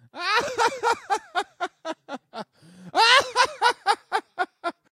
male Bram laughs
male, Bram, laughs evil
evil, laughing